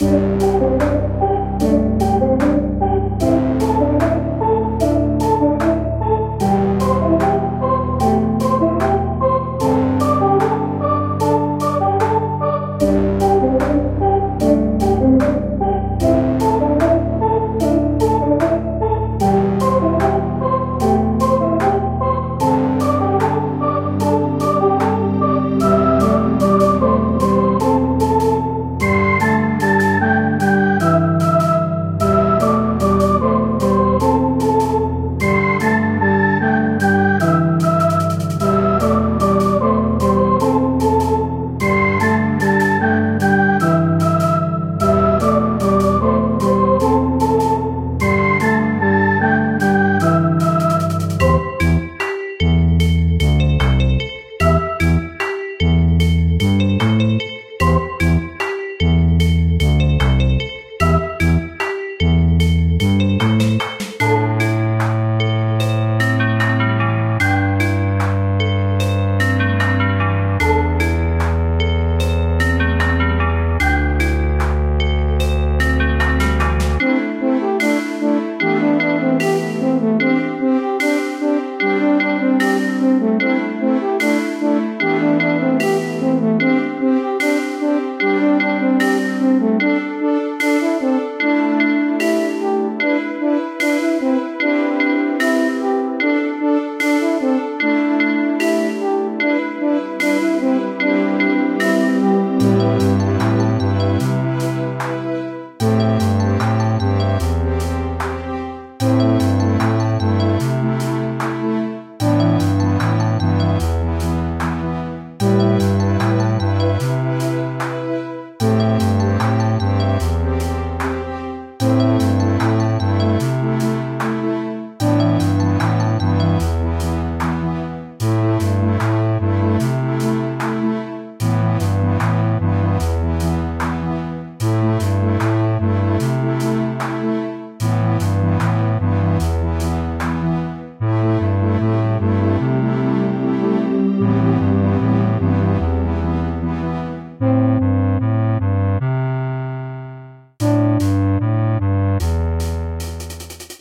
Calm & relaxing music
You can use this loop for any of your needs. Enjoy. Created in JummBox/BeepBox.
atmosphere, background, calm, game, loop, melody, music, ost, peace, peaceful, relaxing, sample, slow, soothing, soundtrack, theme